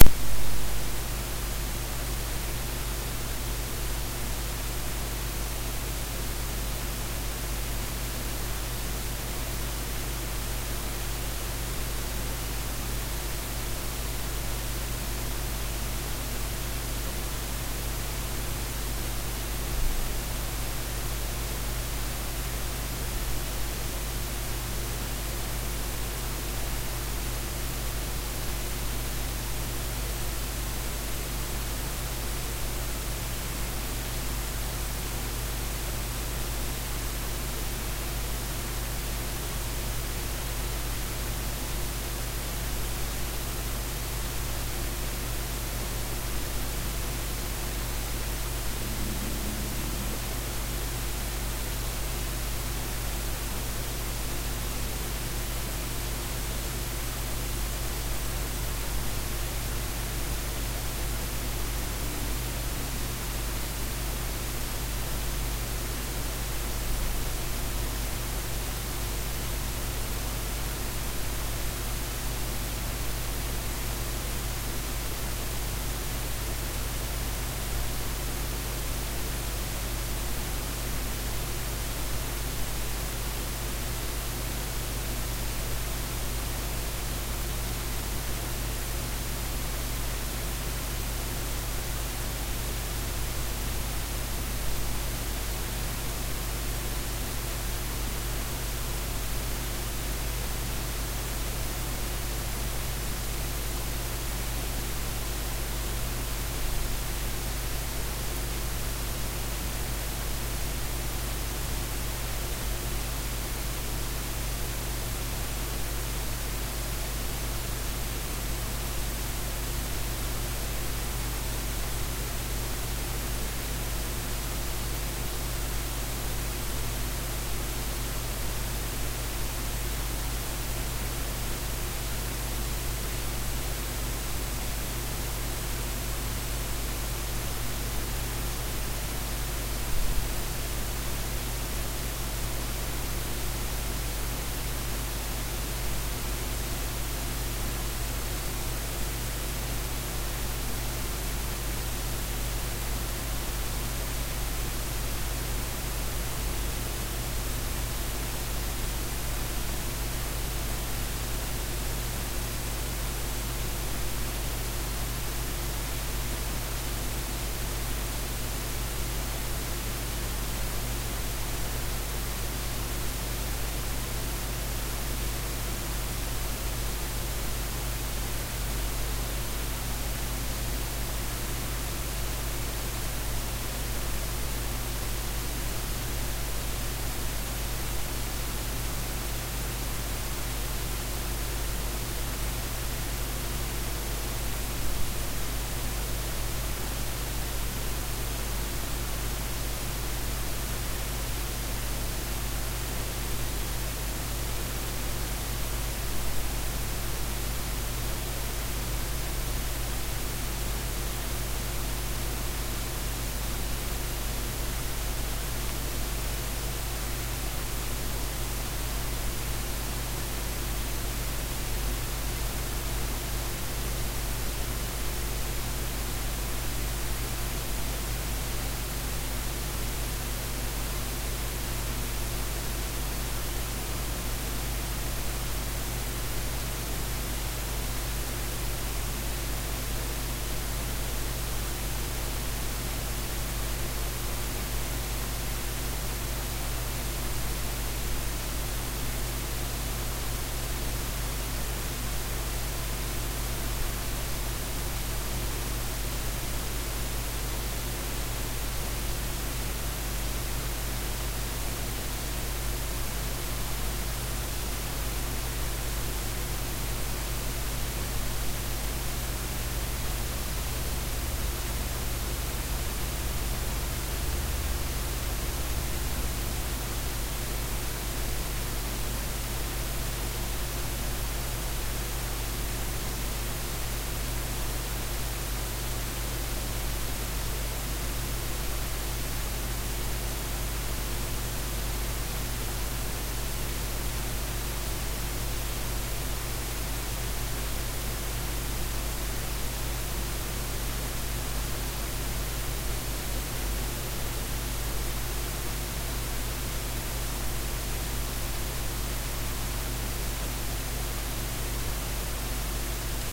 ECU-(A-XX)12+
ATV Running Mode
ATV, Mode, Running